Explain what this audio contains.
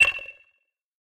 STAB 020 mastered 16 bit
An electronic percussive stab. A sound like coming from some 23rd
century robot bird. Created with Metaphysical Function from Native
Instruments. Further edited using Cubase SX and mastered using Wavelab.
electronic, industrial, percussion, short, stab